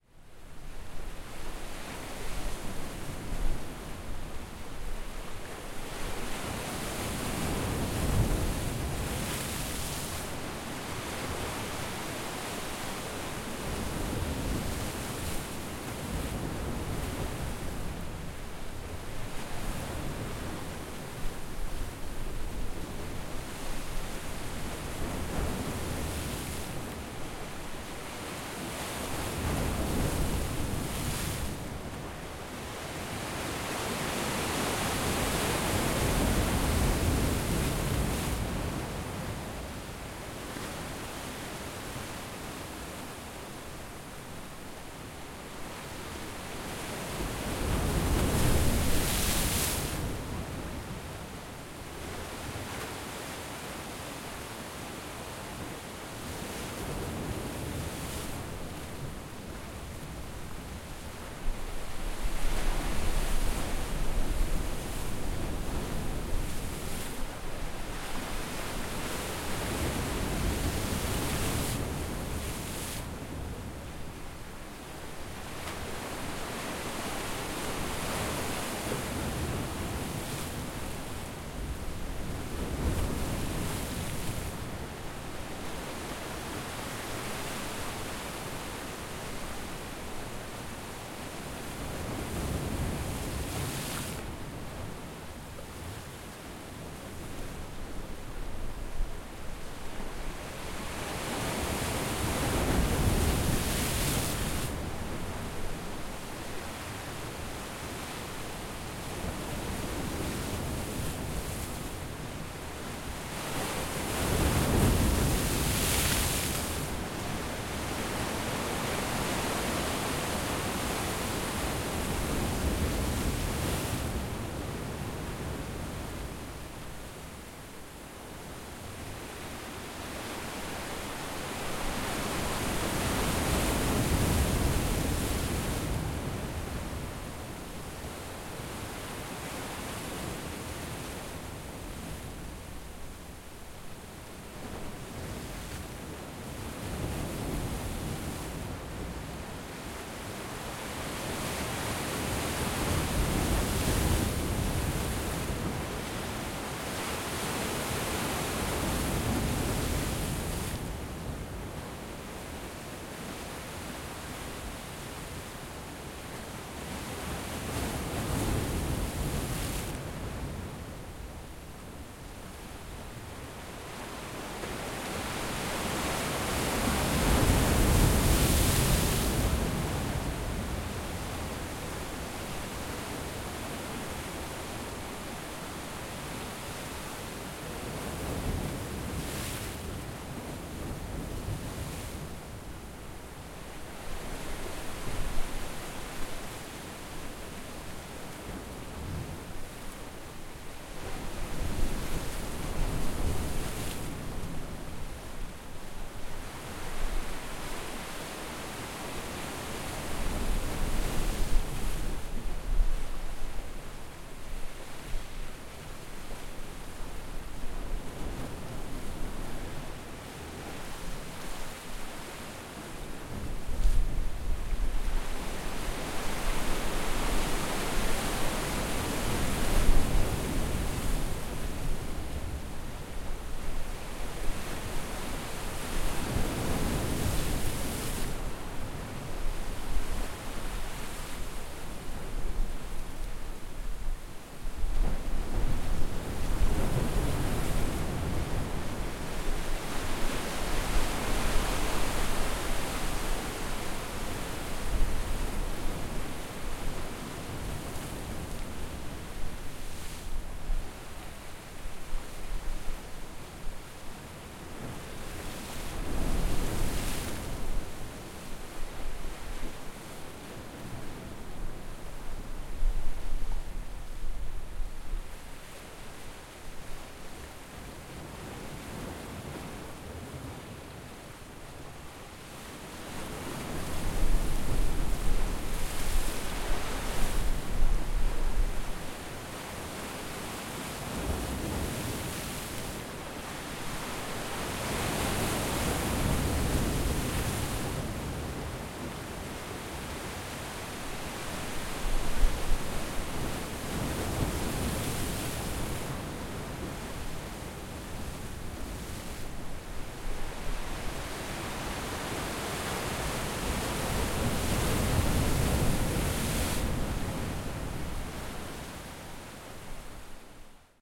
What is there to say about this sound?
This excerpt describes the breakwater sound of Gandia (Valencia, Spain).
Recorded with Zoom H4n about 13h40 on 12-11-2014
beach, breakwater, water